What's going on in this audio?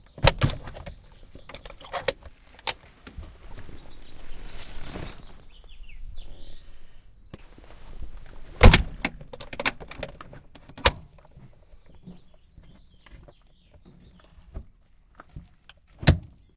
1976 Volkswagen Cloth Top Open and Close
Vintage 1976 cloth top VW Bug.
I searched the database for this sound with no luck.
Luckily I had some friends that could help me out.
2 people (my Friends Terese and JB) opened and closed the cloth top while I sat in the passenger side recording.